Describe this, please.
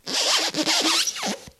Creepy Shoe Sound